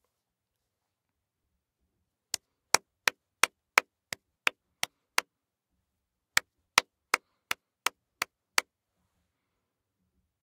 Claps03 FF052
One person clapping. Slight tinny quality, medium to fast tempo, low energy
clap-varations, Claps, clapping